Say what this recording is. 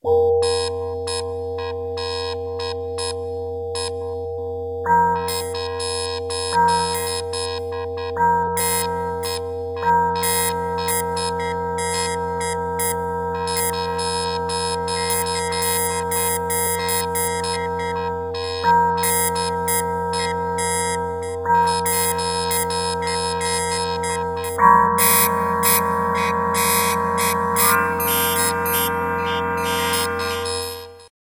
Dustette 30 sec
Data transfer sound of the future?